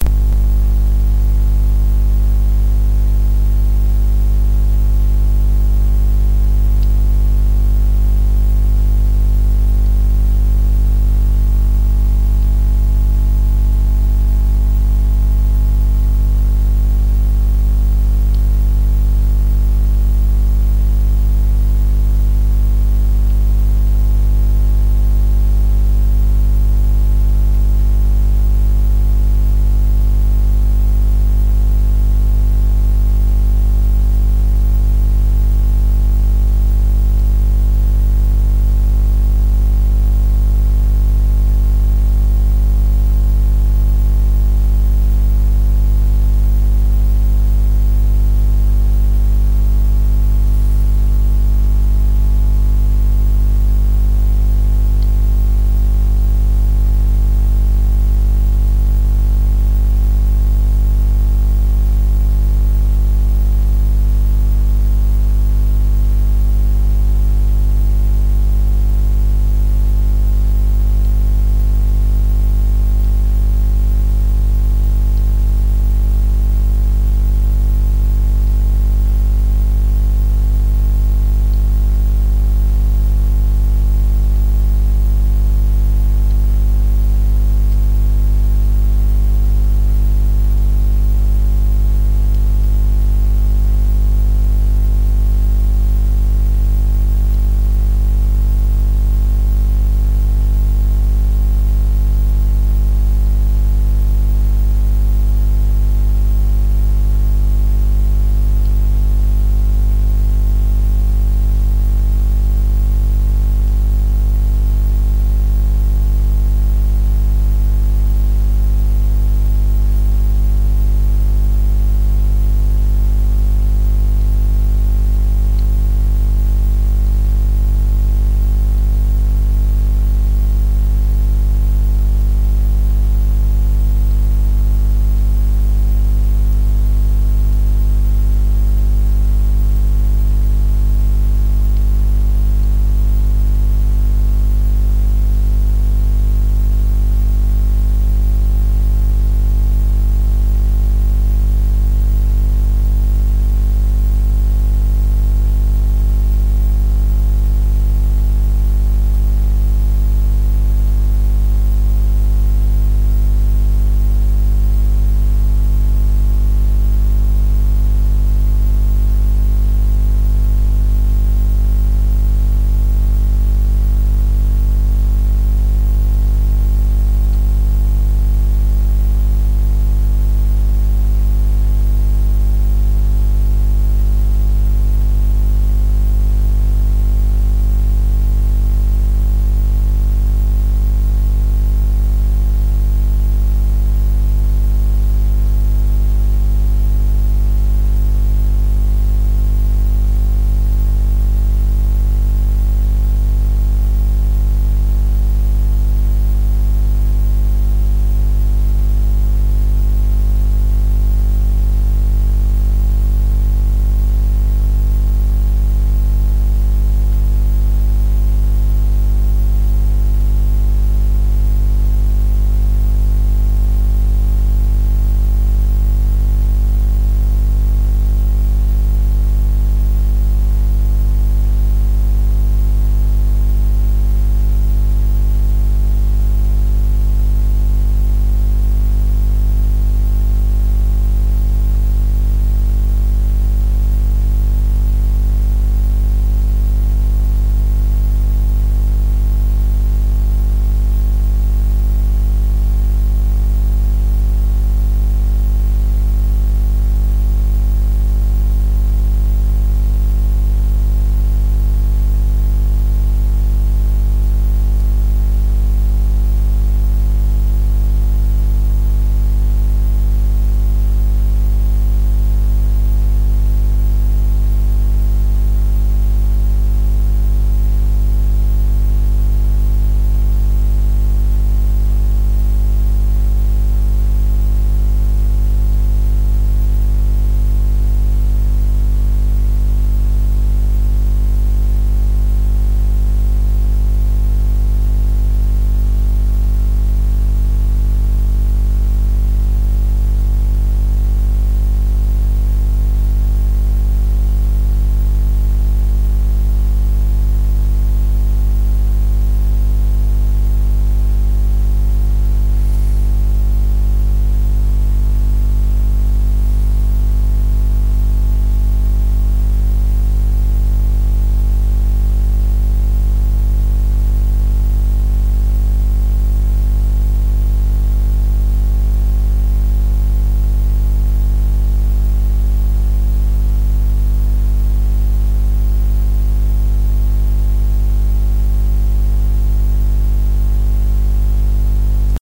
ISO Synchronous Backup Running Trail Path Channel Park Forest Riverside Ring